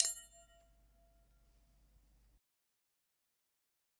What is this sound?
Heatsink Small - 31 - Audio - Audio 31
Various samples of a large and small heatsink being hit. Some computer noise and appended silences (due to a batch export).
bell, ring, hit, heatsink